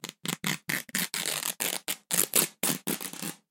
Rip And Tear4
Sword noises made from coat hangers, household cutlery and other weird objects.
Foley, Fight, Blade, Draw, War, Slice